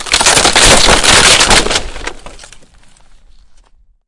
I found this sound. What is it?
Destruction, Wooden, A
(Warning headphone users)
A destructive sound created by piecing together all of the sounds in my "Wooden Fence Sounds" pack for an explosive effect.
An example of how you might credit is by putting this in the description/credits:
And for similar sounds, do please check out the full library I created or my SFX store.
Edited together using Audacity on 17th August 2016.